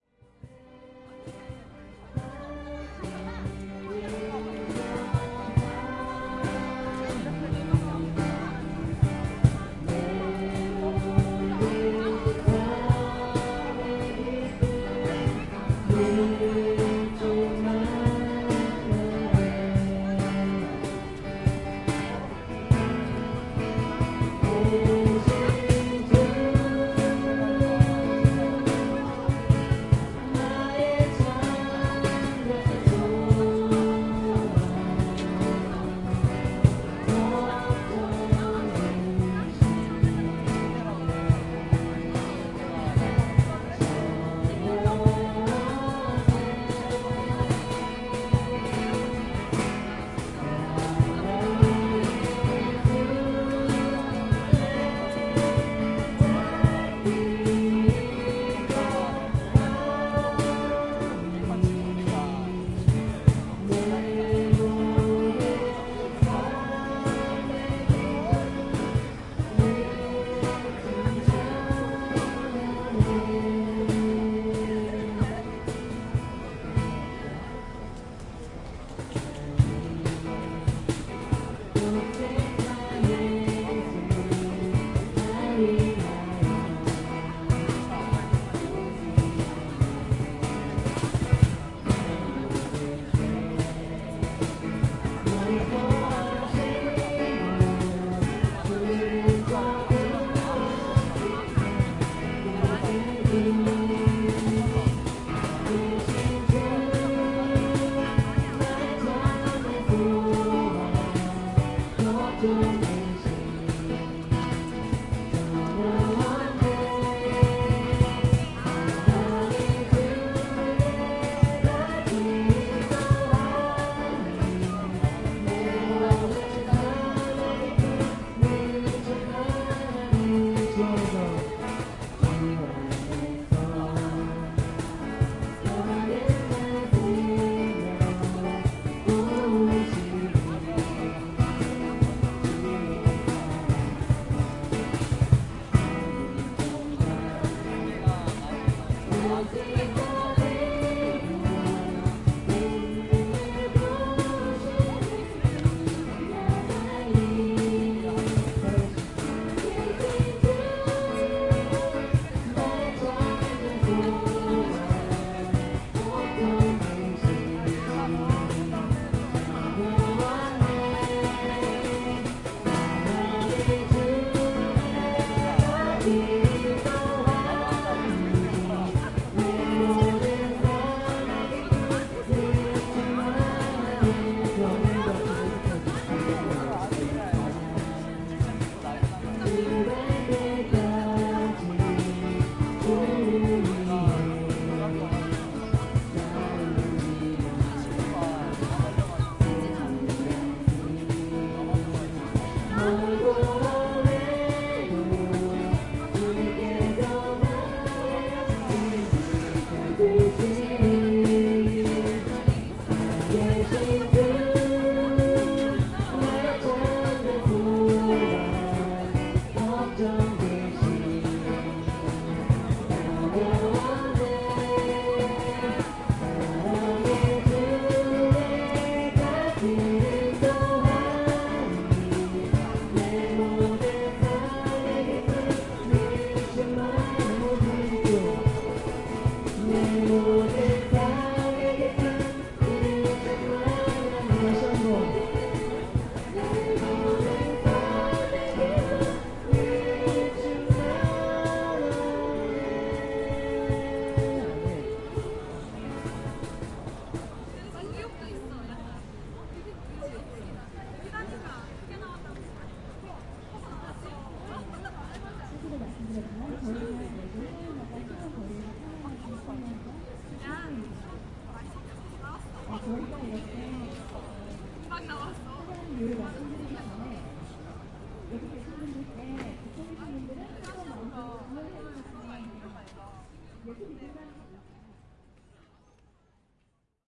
0372 Religious music
Religious music at . People talking in Korean.
20120717
field-recording, seoul, music, voice, korean, korea